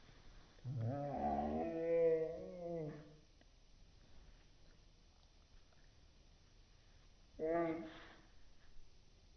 bear 2 reverb
Recording of my cat snarling, recorded on Tascam DR 07, reduced the pitch and edited on Audacity.